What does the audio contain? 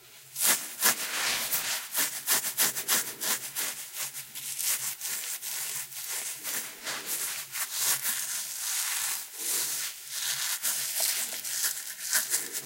20090405.other.hairy.parts
not for the prude: close-up of the noise of other hairy parts of my body being scratched and... you guessed, that was my pubic area. Stereo recording with Sennheiser MKH60 + MKH30 into Shure FP24 preamp, Edirol R09 recorder
body, closeness, female, fetish, indecent, male, sex